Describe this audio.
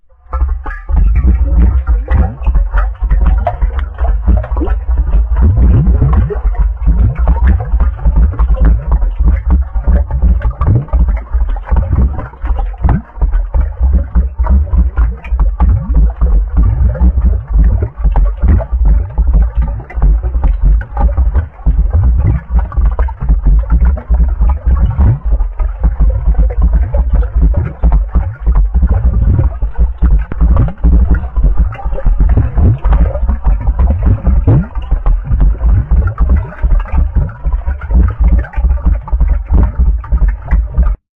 bubbling sewer
made it with glass bottle of tarhun
ambience, ambient, atmosphere, bubbling, creepy, dark, Gothic, haunted, phantom, scary, spooky, suspense, terrifying, terror